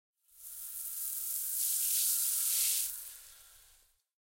water sea spray H06
A hose spray nozzle spraying while passing the mic. Can be used as sweetener for sea spray hitting the deck of a ship.
hose, sea-spray, ship, nozzle, water, spray